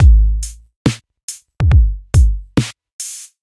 Beats recorded from an MFB-503 analog drummachine